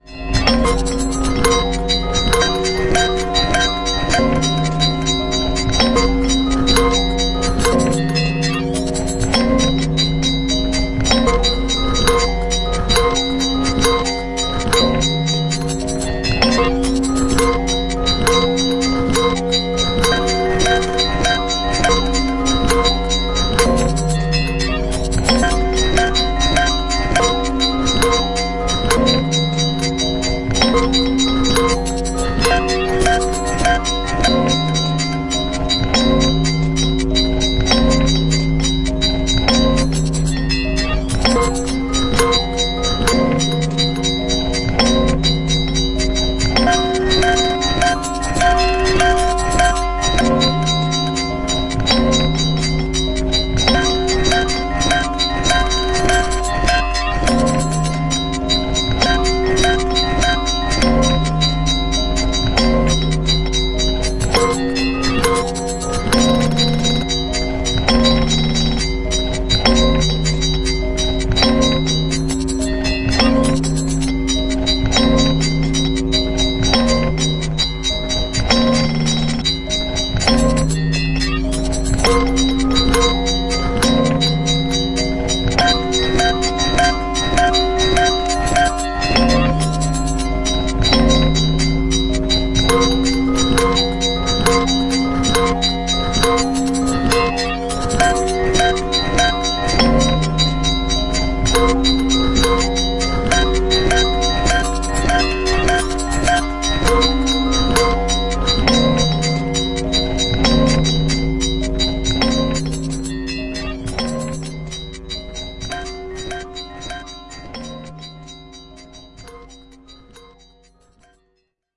THe resulting output from a a custom-programmed MaxMsp patch given several samples including music boxes, bells, and slverware.
algorithmic, music-box, maxmsp, loop, sampled, toy, generative, rhythmic, processed, electronic, abstract, bell, percussion, zen, glitch, rhythm, evolving, silverware